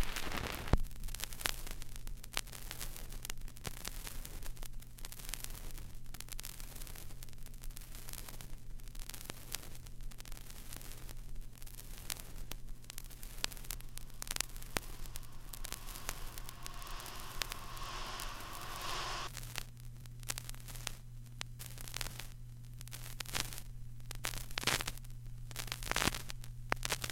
Record noise from a very old, warped and scratched up voodoo record from early last century digitized with Ion USB turntable and Wavoasaur.
noise, phonograph, popping, record, scratch, vinyl